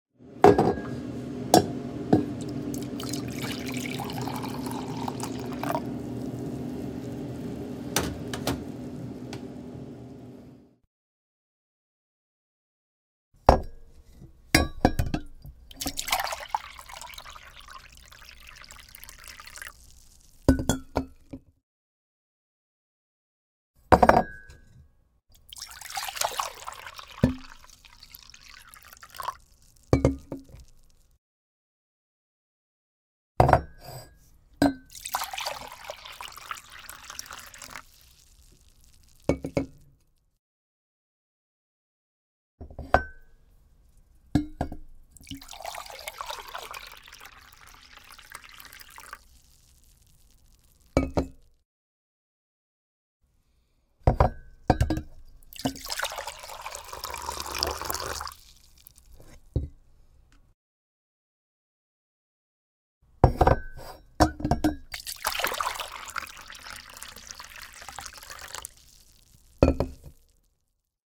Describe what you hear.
Pouring Coffee (Several Times)
pour, cup